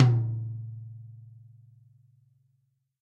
X-Act heavy metal drum kit==========================Drum kit: Tama RockstarSnare: Mapex mapleCymbals: ZildjianAll were recorded in studio with a Sennheiser e835 microphone plugged into a Roland Juno-G synthesizer. Cymbals need some 15kHz EQ increase because of the dynamic microphone's treble roll-off. Each of the Battery's cells can accept stacked multi-samples, and the kit can be played through an electronic drum kit through MIDI.